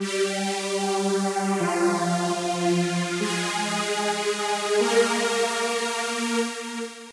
Riser 6 Flicker
Strings for riser 5. 150 bpm
progression, strings, beat, synth, melody, techno, 150-bpm